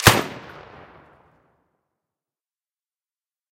I went for a more realistic gun sound without the Hollywood subbass and a lot cleaner than my previous work
Made in ableton live, i just layered an ak47, an m16, an enfield rifle and an acoustic kick drum together with eq and used parallel compression to gel them together. Transient designers were used on the layers to make them snap harder.
A bolt slide forward sample and a bolt slide back sample were added to give some mechanical feels to the gunshot.